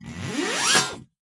BS Zip 6
metallic effects using a bench vise fixed sawblade and some tools to hit, bend, manipulate.
Metal, Rub, Buzz, Grind, Zip, Scratch